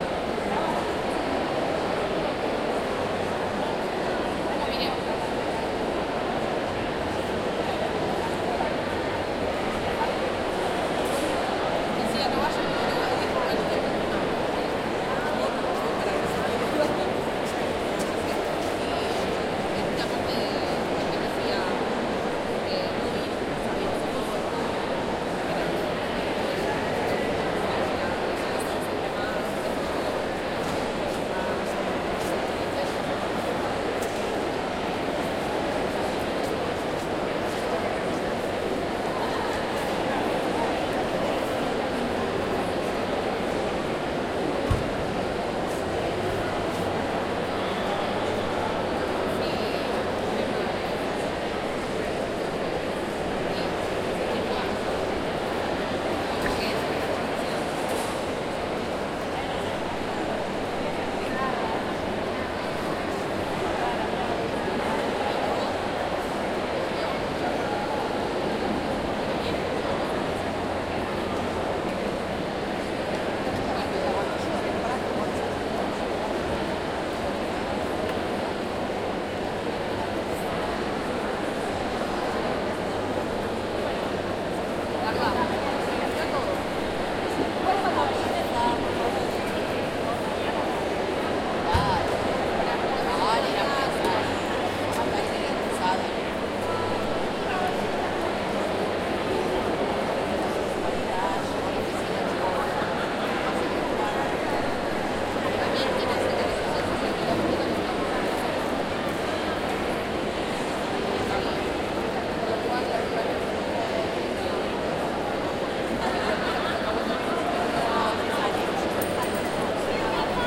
Stereo field-recording of lot of students talking and eating in the University of Buenos Aires.